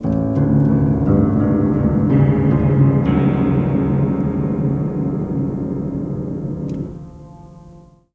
Detuned Piano Pattern Up 2
series of broken piano recordings made with zoom h4n
broken, eery, piano, thrilling, untuned